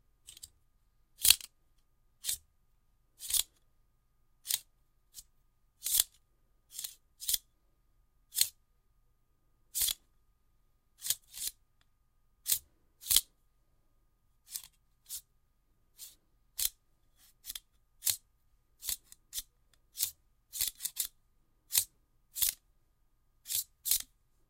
Telescope Open
Imitating the sound of sliding open a telescope by sliding the metal ring up and down a set of tongs
knife, telescope, metallic, clang, metal, ring